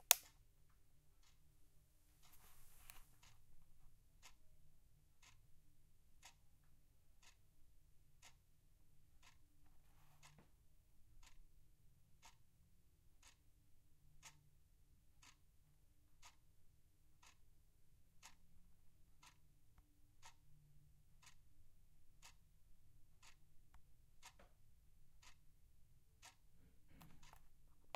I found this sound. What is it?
Ticking Kitchen Clock recorded with Roland R-05